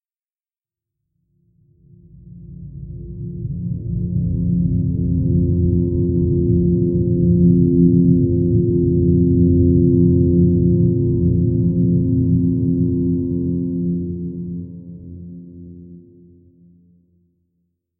This sample is part of the “Pad 002 – Lofi Structure” sample pack. Soft mellow pad. The pack consists of a set of samples which form a multisample to load into your favorite sampler. The key of the sample is in the name of the sample. These Pad multisamples are long samples that can be used without using any looping. They are in fact playable melodic drones. They were created using several audio processing techniques on diverse synth sounds: pitch shifting & bending, delays, reverbs and especially convolution.